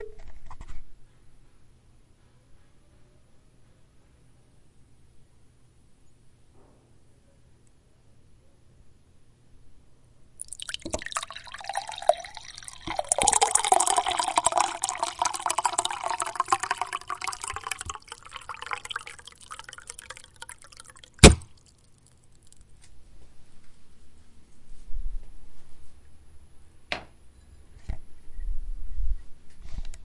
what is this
200813 eco filtro pouring water
Pouring water into a glass with an eco-filtro.
glass-of-water, pouring-water, filter, water, liquid, filtro, pouring, eco, agua